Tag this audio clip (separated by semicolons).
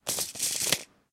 tape; Meter